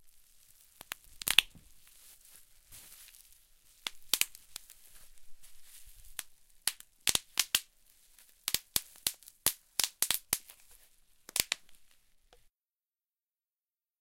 Popping bubble wrap, recored with a Zoom H4n
Bubble, Burst, H4n, SFX, Short, Wrap, Zoom